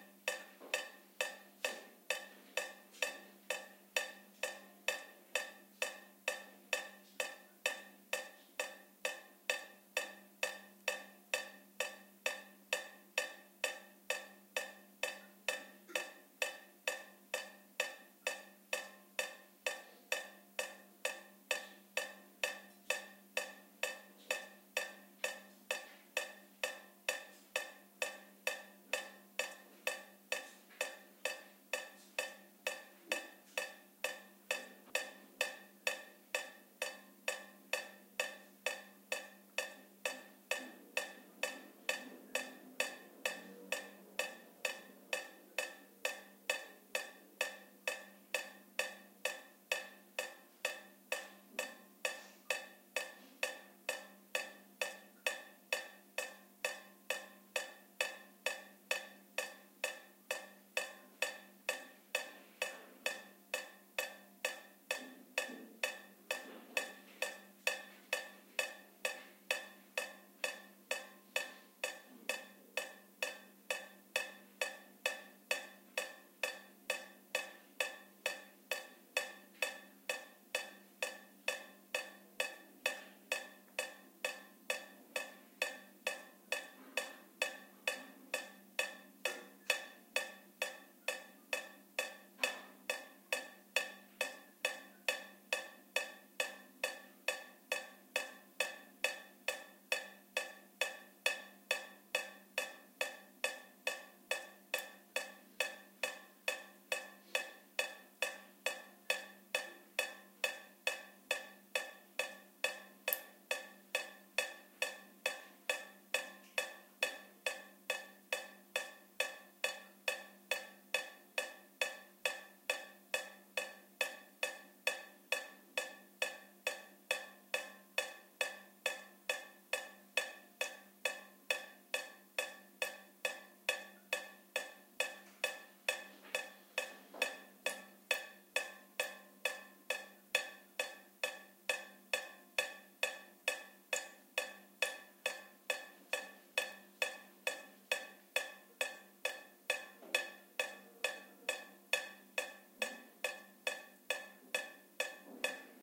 20110924 dripping.mono.12
dripping sound. Sennheiser MKH60, Shure FP24 preamp, PCM M10 recorder